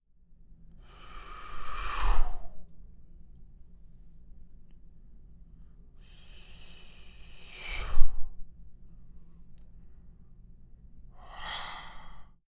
35.cambio escena Scene fade
fade sound used for scene changes or more like flashbacks, made with my mouth
Fade, scene